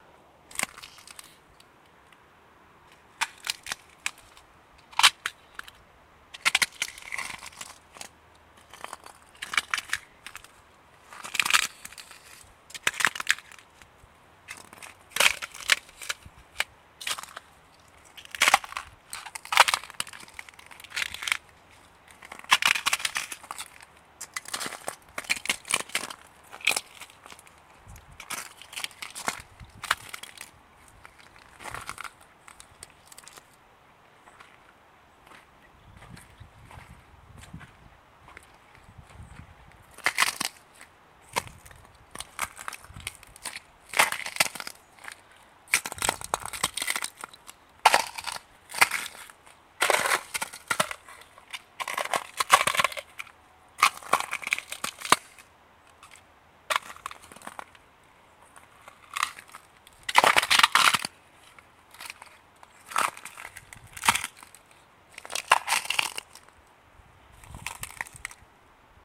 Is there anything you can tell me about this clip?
little spot of ice on a street, in a village in Italy
simply walking on it to record the sound of ice-cracking
Bye
F.